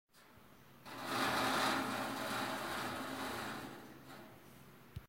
passing the nail through a metal grid
Passei a unha na grade de metal da minha janela.
metallic, grid, clang, metal, iron